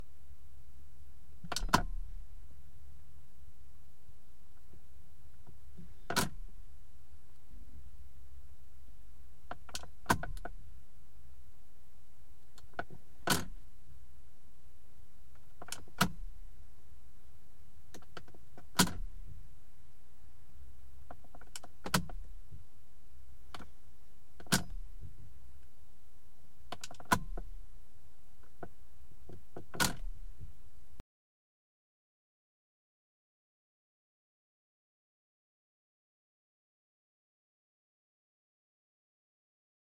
Turn signal switch on a Mercedes Benz 190E, shot from the passenger seat with a Rode NT1a.